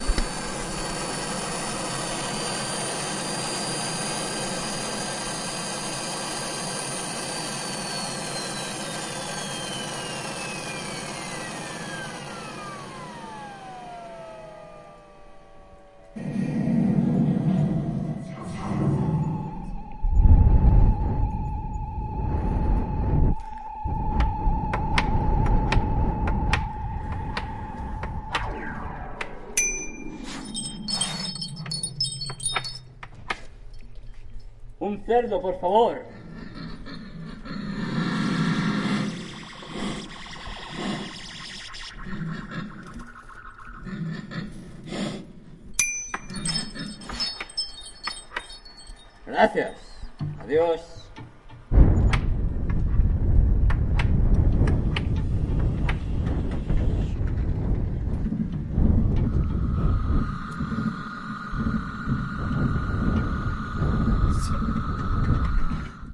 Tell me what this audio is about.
windy future city
A man arrives in a plain to a windy city, goes into a pet shop, buys an animal and he leaves.
data, foley, future, pure, scoring